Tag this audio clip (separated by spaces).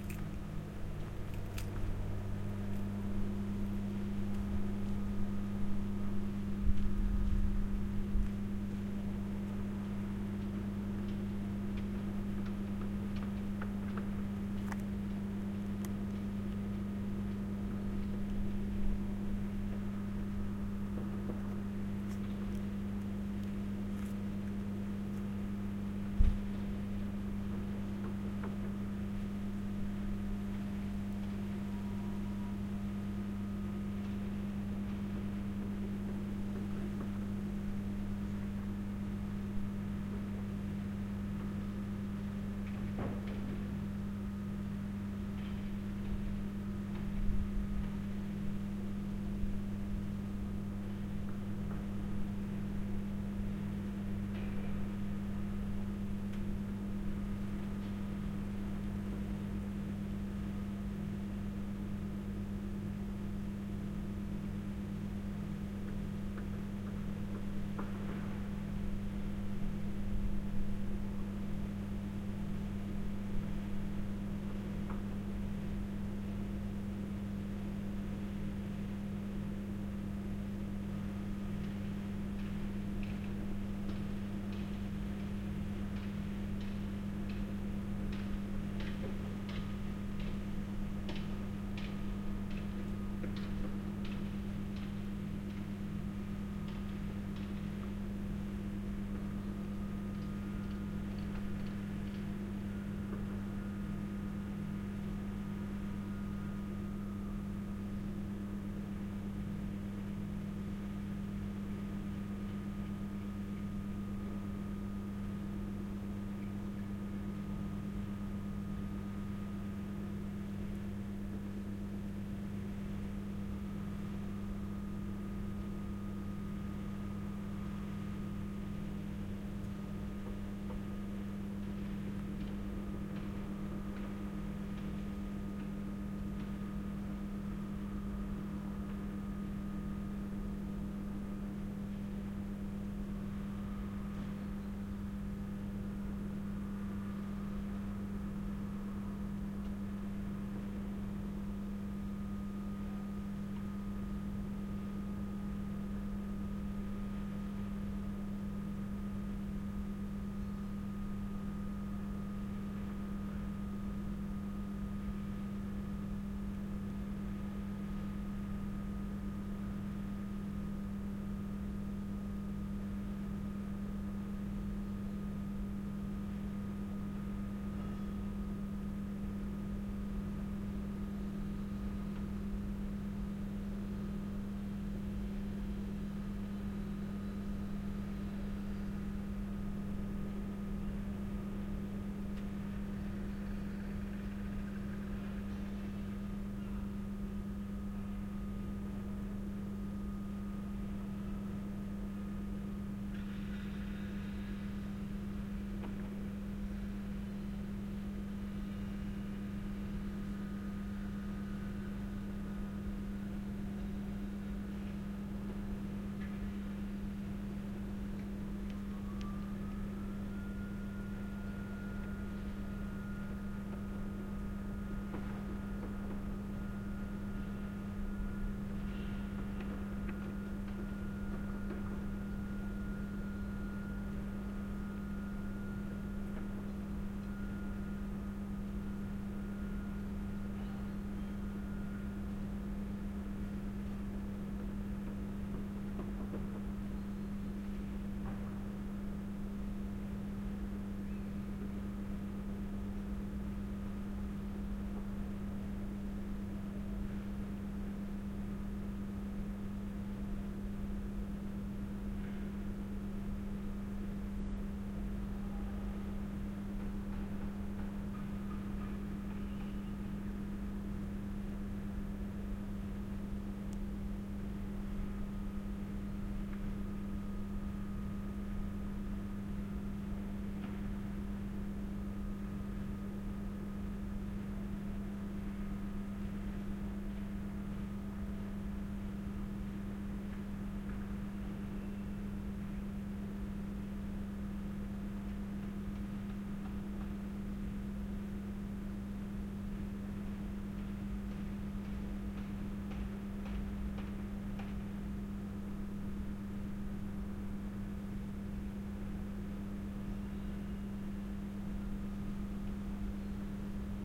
construction atmosphere building